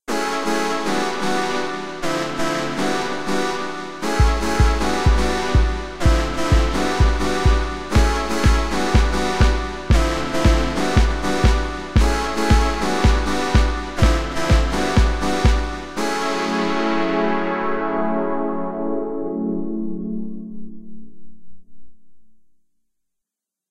music game, title
Music created in Garage Band for games. Title music. (really bad tune, laugh if you want)